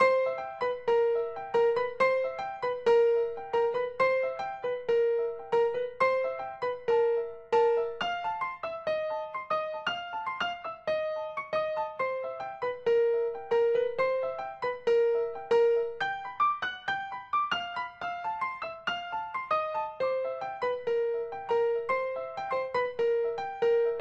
Song4 PIANO2 Do 4:4 120bpms
120, blues, bpm, Chord, Do, HearHear, Piano, rythm